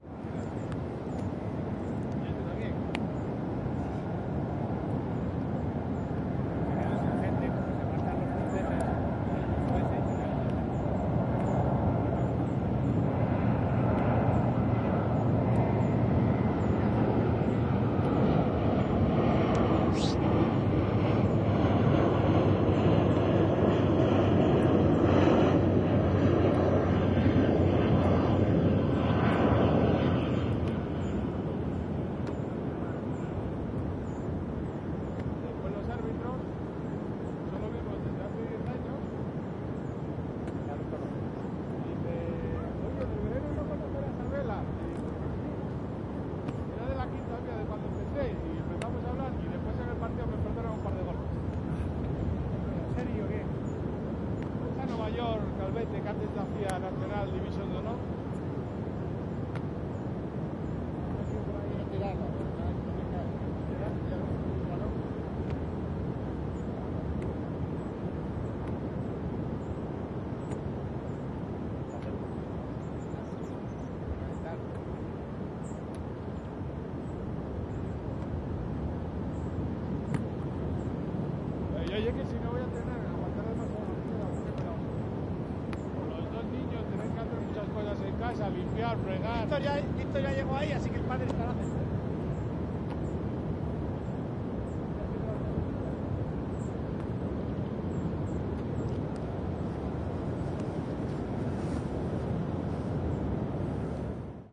160717 HSN men playing ball on the beach
Playa de los Quebrantos near Spanish Puerto San Juan de la Arena. Constant plunging in the background. Big airplane passing by. Then Spanish voices of men playing football.